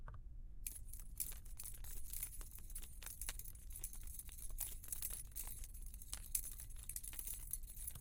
a man jingling keys
jingle, keys, noise